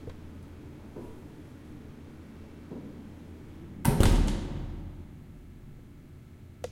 A recording of a heavy door closing at night.